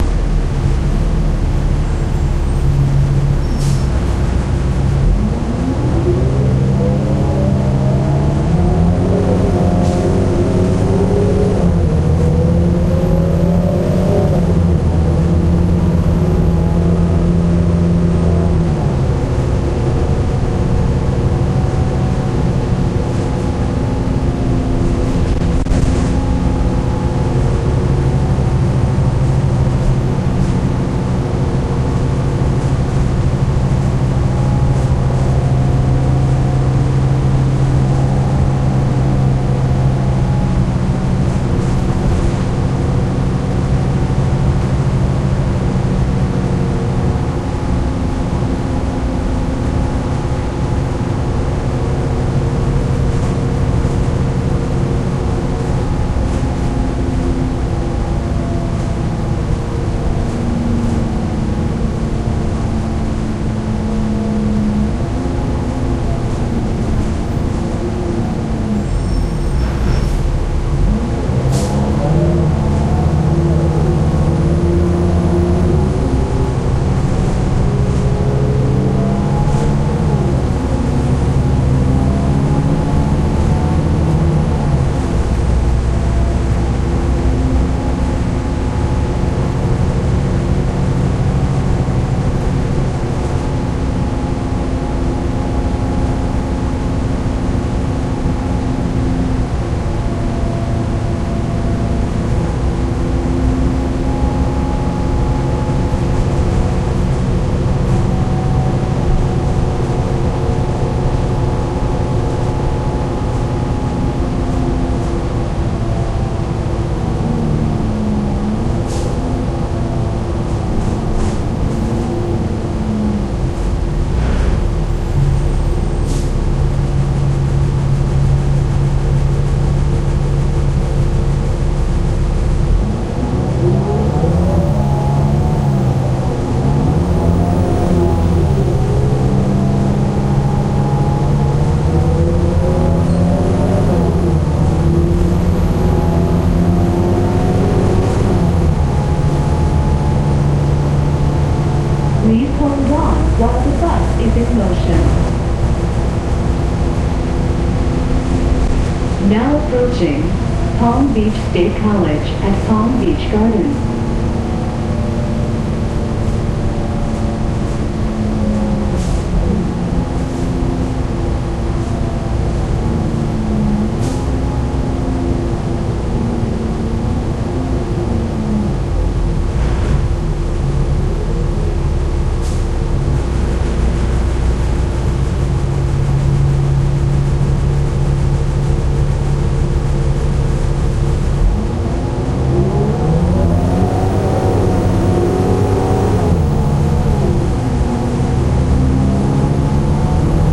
One of a series of recordings made on a bus in florida. Various settings of high and lowpass filter, mic position, and gain setting on my Olympus DS-40. Converted, edited, with Wavosaur. Some files were clipped and repaired with relife VST. Some were not.